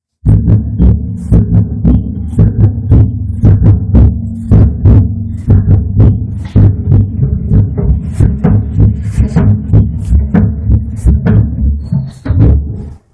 El furruco o furro es un tambor con cuero y una varilla, Suele utilizarse en la música tradicional navideña, así como en la gaita zuliana y los aguinaldos.Tambien se utiliza, en algunos pueblos, en la parranda.está constituido por una caja de madera, cilíndrica y ligeramente cónica, está cubierta por una membrana de cuero seco, vibrante. fijada al tambor con tirantes metálicos, de modo de poder templarla de acuerdo a las necesidades y gusto del intérprete. En el centro de la membrana va fijada una espiga de madera, de poco más de un centímetro de diámetro, cuyo extremo, romo, va engastado en el cuero, y es atado fuertemente por el lado opuesto de la membrana. Dicha espiga de madera, de unos 7 centímetros de longitud, tiene forma cónica, y va aguzada en la punta. Esto tiene por objeto el que en el extremo libre va colocada una varilla de caña, de unos 125 centímetros de longitud.
cuero, furro, Furruco, gaitas, musica, parranda-venezolana, percusion